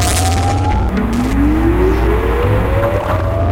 percussion with spooky atmosphere
atmosphere, baikal, concrete, electronic, gloomy, loop, spooky